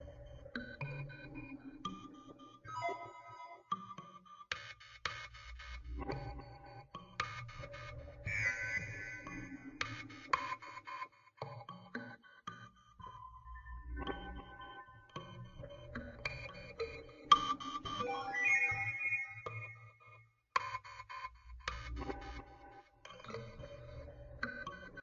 kalimba home made with some delay